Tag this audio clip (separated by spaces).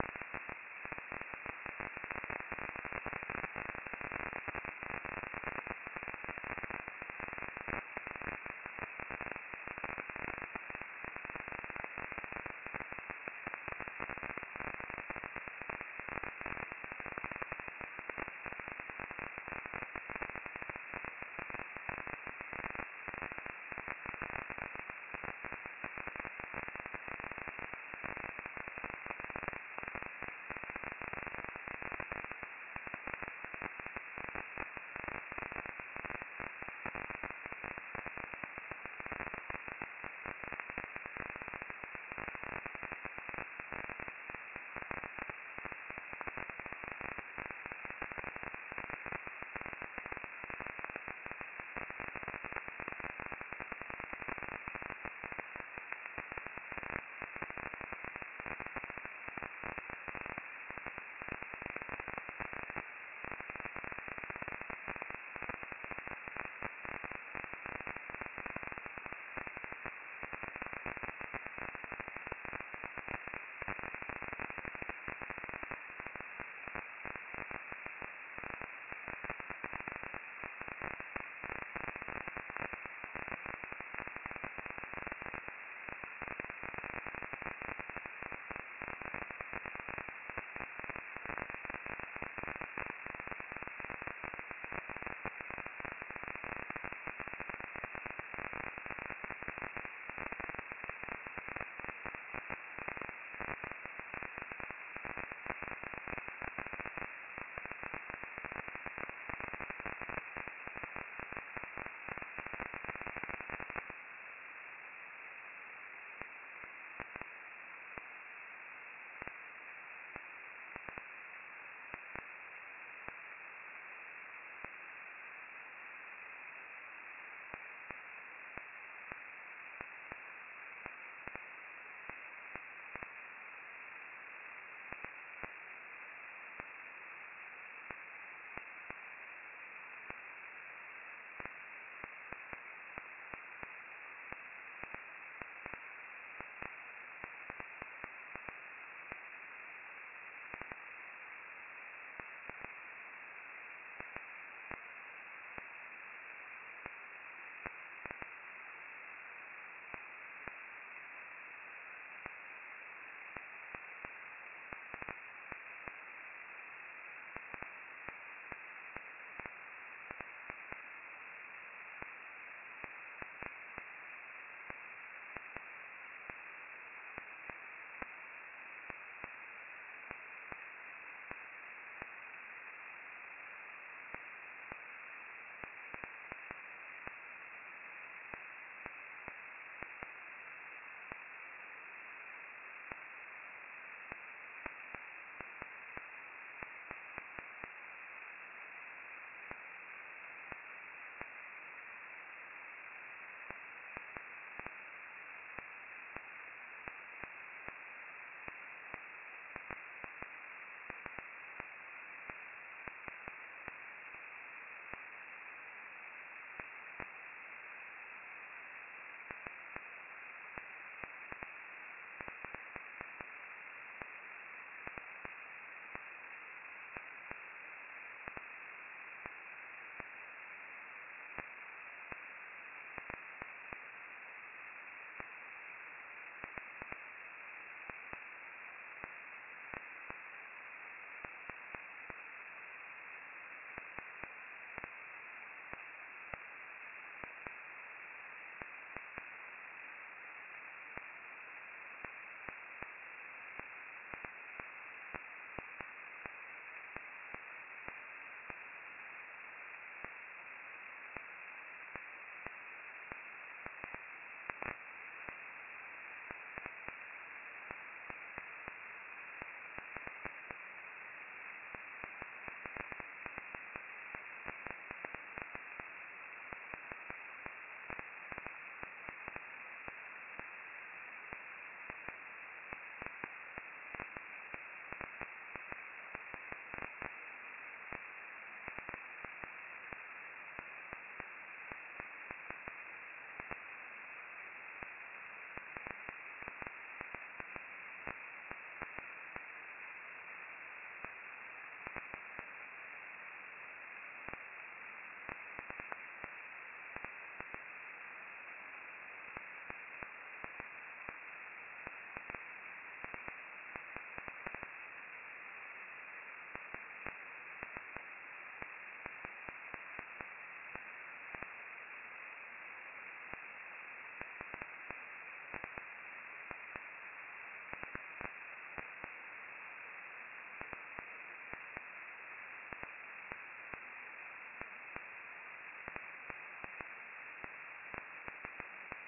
fountain noaa satellite